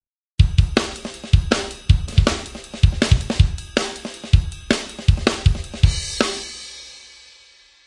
Dayvmen with Ride
this is a new take on an old favorite. my version of the Amen break, with different accents, fills, and rolls. and of course fresh drum sounds. Created with Reason and RDK 2.0. this is the version with the ride instead of the hi-hats.
drumloop, drumnbass, funky